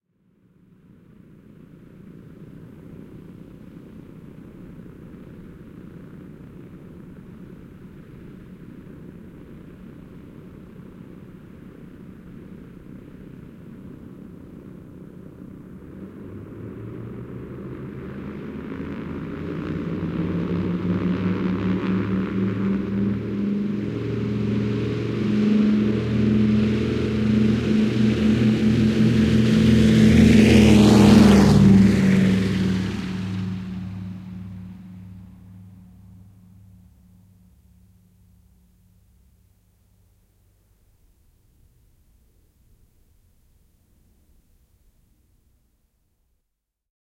Lentokone, potkurikone, rullaus, nousu, lähtö / An aeroplane, passenger plane, propeller aircfaft, DC-3, Dakota, taxing, taking off
Matkustajakone DC-3 (Dakota). Rullaus kiitoradan päähän, lähtö nousuun, nousu ilmaan, etääntyy.
Paikka/Place: Suomi / Finland / Kouvola, Utti
Aika/Date: 04.04.1978
Aeroplane, Air-travel, Aviation, Field-Recording, Finland, Finnish-Broadcasting-Company, Ilmailu, Lentokoneet, Potkurikoneet, Soundfx, Suomi, Takeoff, Tehosteet, Yle, Yleisradio